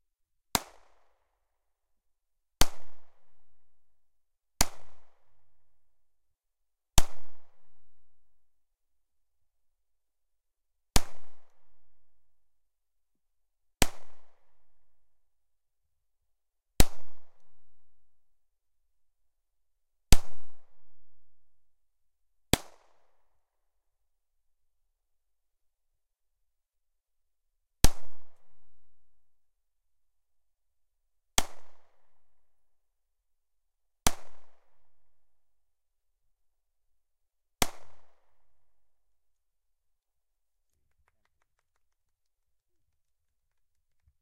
9mm Shot in the Forest Unprocessed
9mm handgun fired in the forest. No post processing or sweetening on this file.
Please ignore my wife and daughter clapping at the end. They couldn't keep quiet!